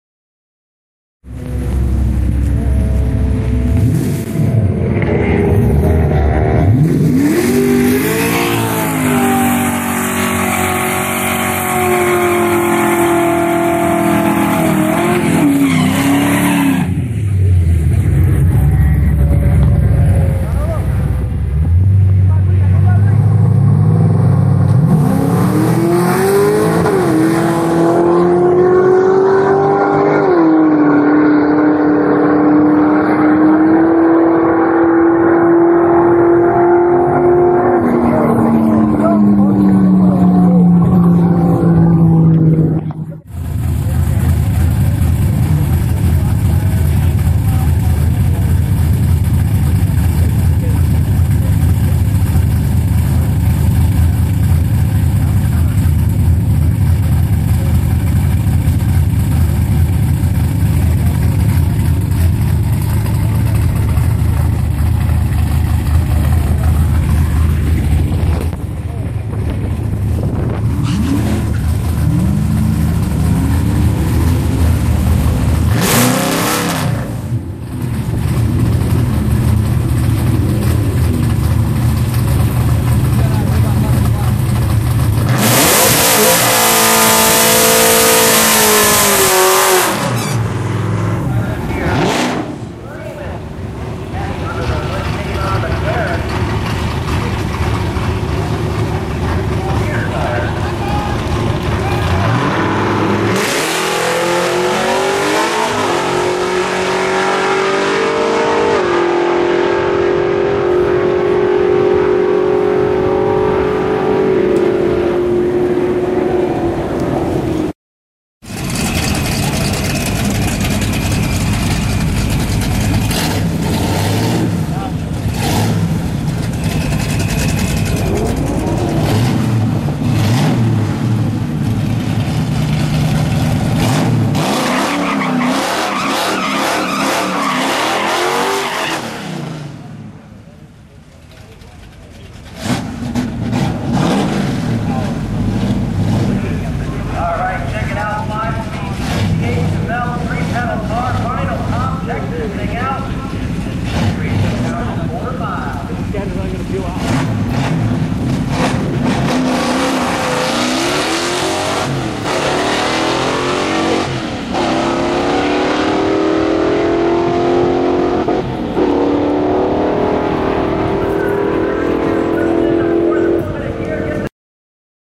Recorded sounds of car and truck engines at drag race.